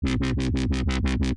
i made it in fl studio with pulseing wobble